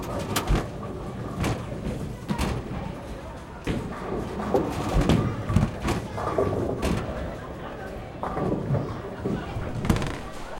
Bowling alley ball return
alley, bowling, bowling-balls